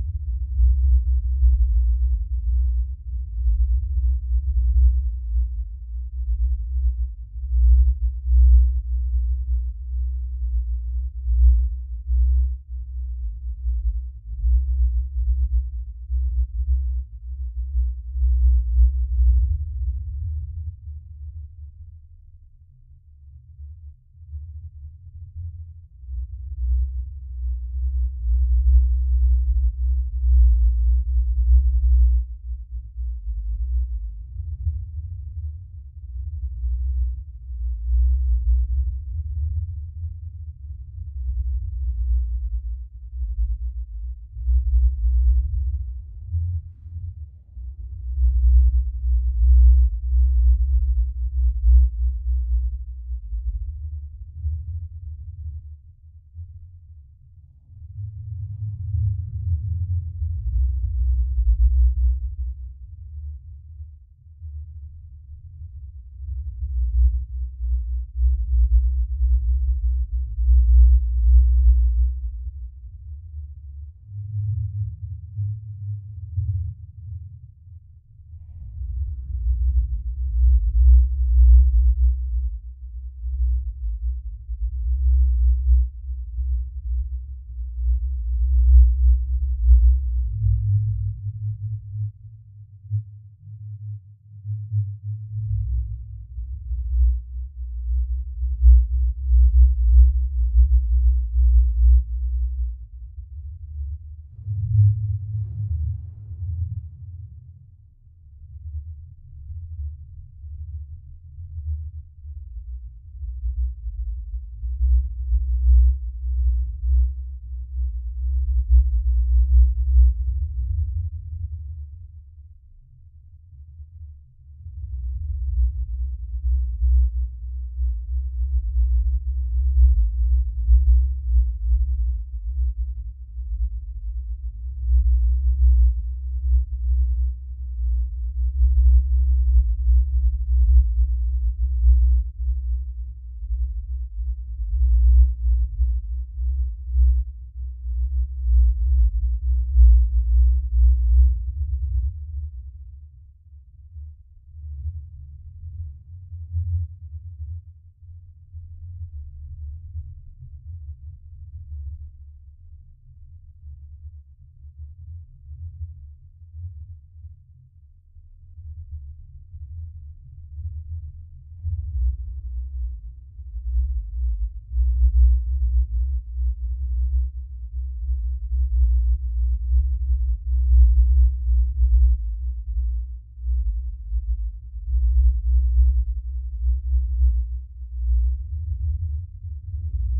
Great as background filler, for sci-fi lab or engine sounds in games, dark ambient compositions.
alien, ambient, background, loop, loopable, low, ominous, planet, rumble, sci-fi, seamless